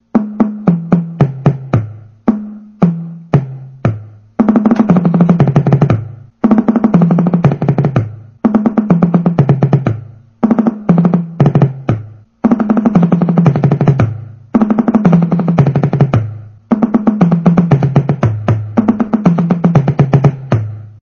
I was just playing this on one of my Roland TD7 patches.